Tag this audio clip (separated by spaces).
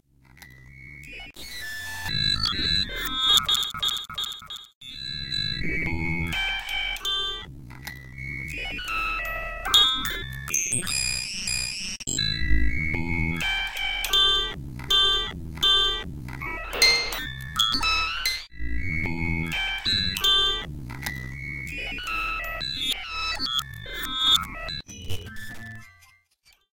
carillon editing manipolate noise reverse sound sperimental strange sweet